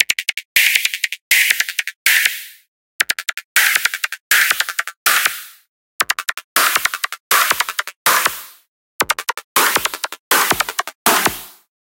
20140914 attackloop 160BPM 4 4 loop1.4
This is a loop created with the Waldorf Attack VST Drum Synth and it is a part of the 20140914_attackloop_160BPM_4/4_loop_pack. The loop was created using Cubase 7.5. Each loop is a different variation with various effects applied: Step filters, Guitar Rig 5, AmpSimulator and PSP 6.8 MultiDelay. Mastering was dons using iZotome Ozone 5. Everything is at 160 bpm and measure 4/4. Enjoy!
electro, electronic, hard, loop, rhythmic